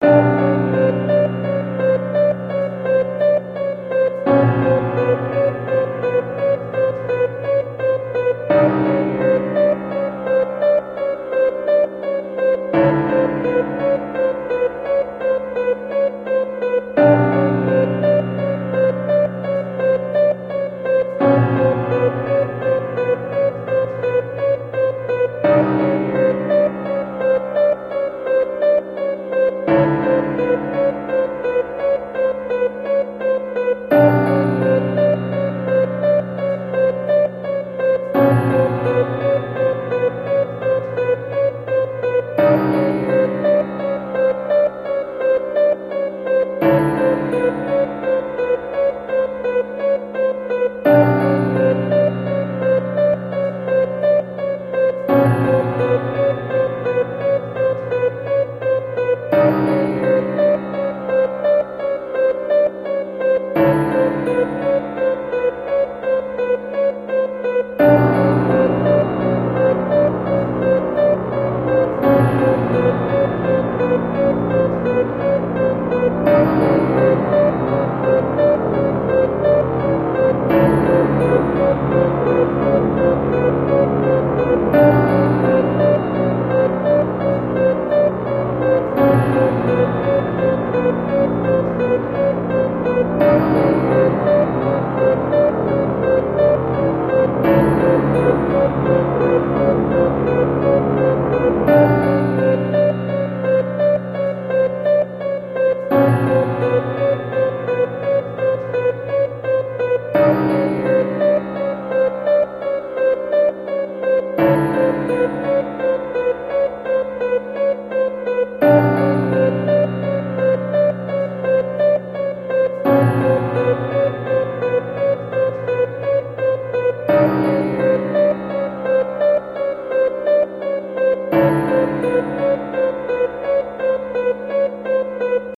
Genre: Horror
My old school style of horror music